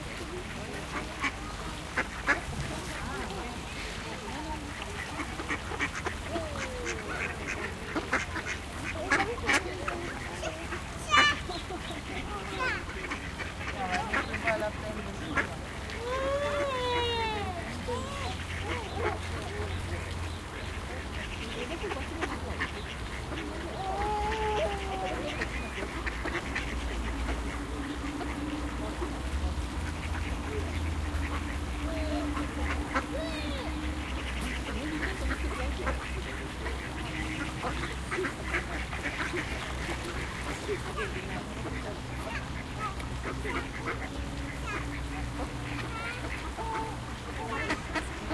Ducks in Parc Merl 1
Recording session in Parc Merl (Luxembourg).